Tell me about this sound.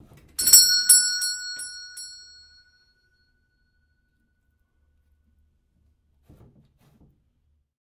Doorbell Pull with pull Store Bell 03
Old fashioned doorbell pulled with lever, recorded in old house from 1890
Doorbell, Pull, Store